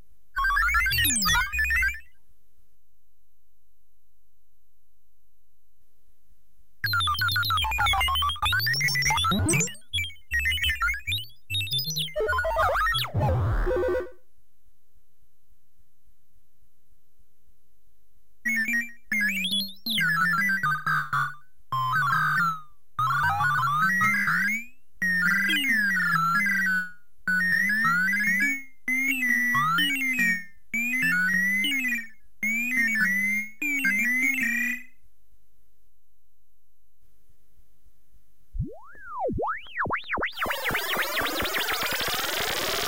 tiedonymppaaja - knowledge forcer
scifi sound used as some kind of knowledge transfer into brain. Canbe used as some kind of computer system or other scifi thing.
scifi, space